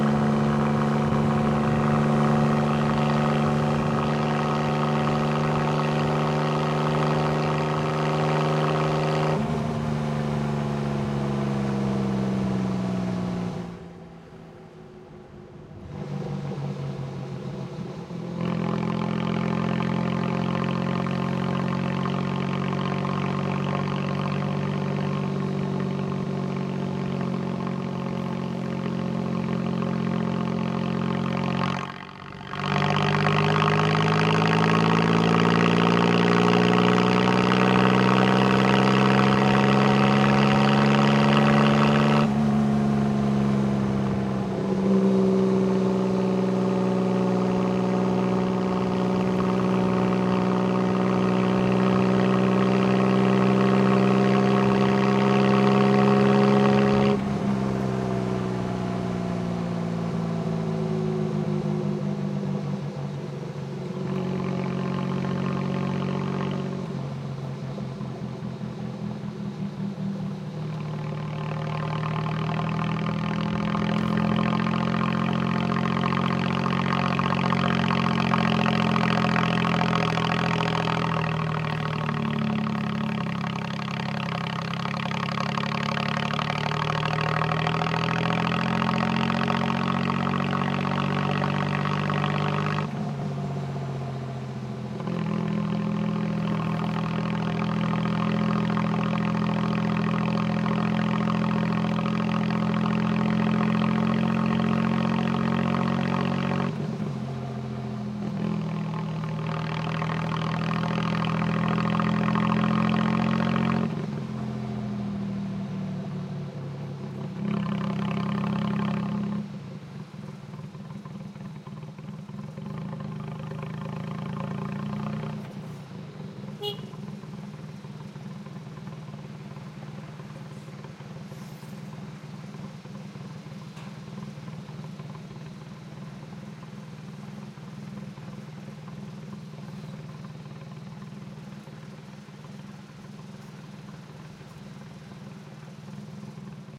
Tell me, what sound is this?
Thailand tuk tuk motorcycle taxi on board engine driving various stop start from exhaust left side on mic right side off mic
tuk, field-recording, motorcycle, taxi, exhaust